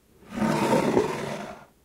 Glass Slide 6
Me sliding a glass cup around on a wooden surface. Check out my pack if this particular slide doesn't suit you!
Recorded on Zoom Q4 Mic
open, close, slide, wood, drawer, glass-scrape, scrape, wood-scrape, glass